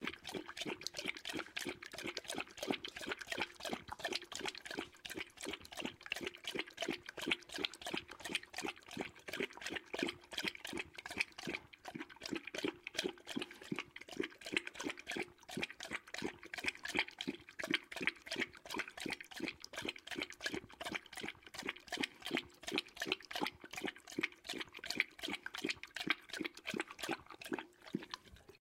Large Dog Drinking
Male labrador retriever drinking water without panting.
drinking, lap, Dog